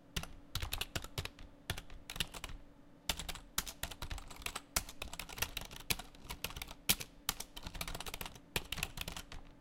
Typing on a keyboard